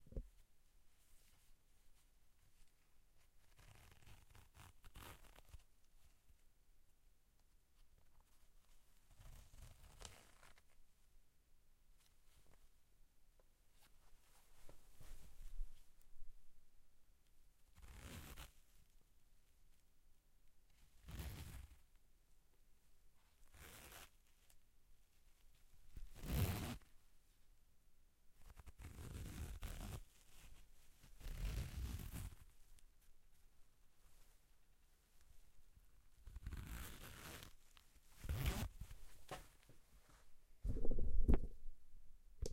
the zipper of my pants.
recorded with zoom H2n